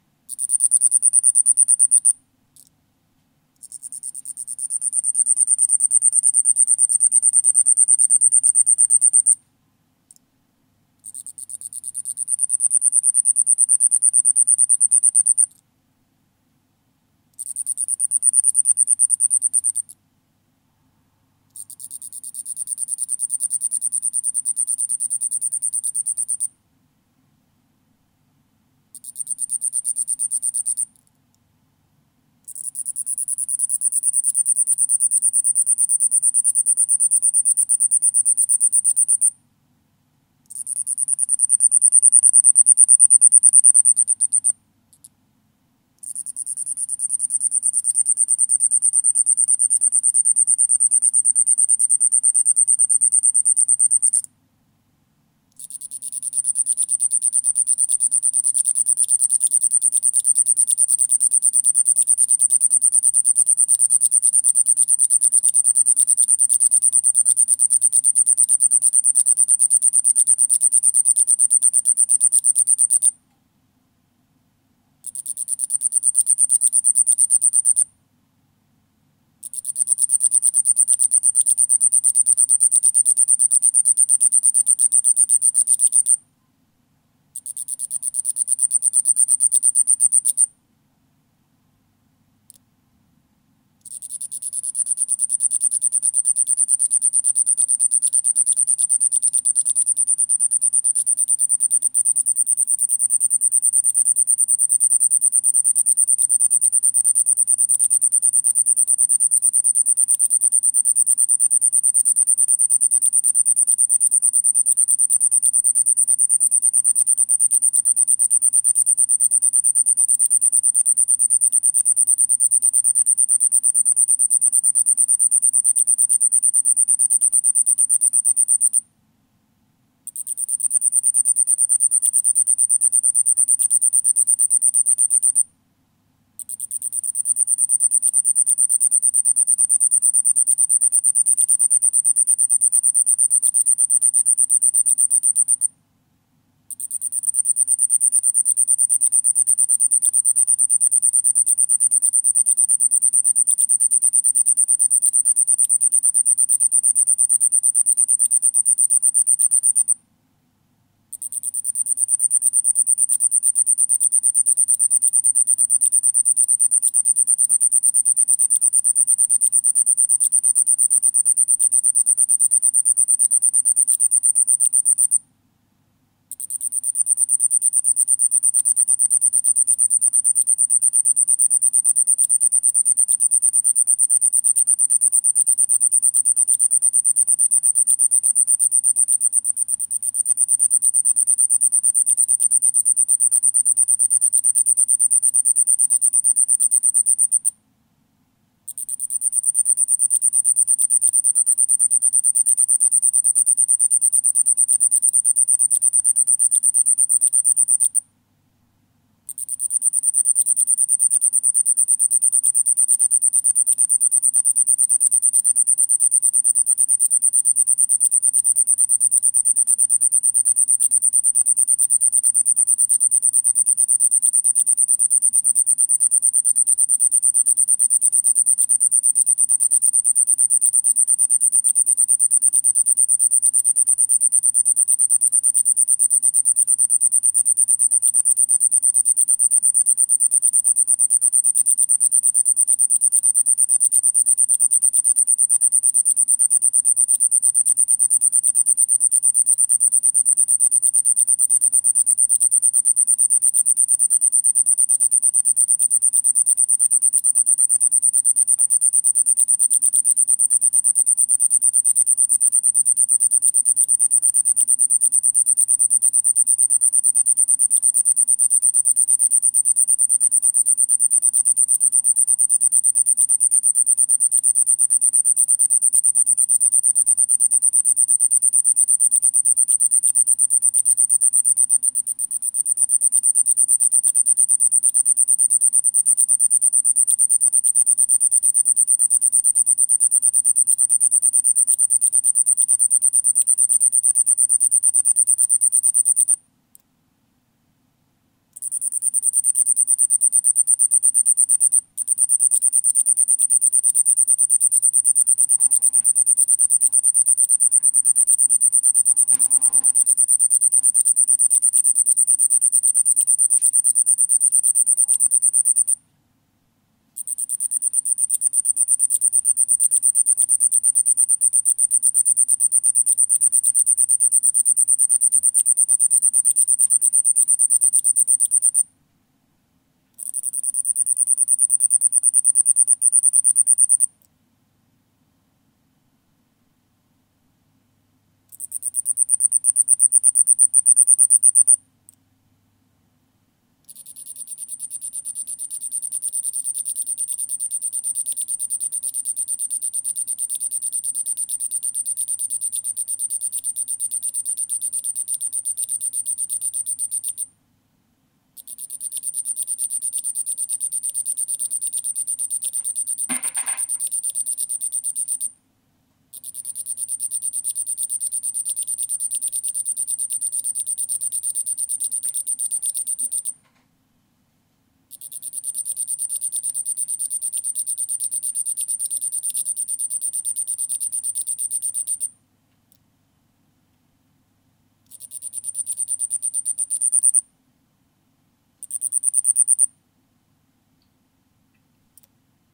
Night Cricket (single)
This night cricket has entered inside my sink.. I don't know how and why! I recorded it as my computer wasn't far. You can hear it's inside the sink and its wings are touching the inside of the sink.
ntg3+ into RME babyfacepro
night, cricket, insects, summer, nature, crickets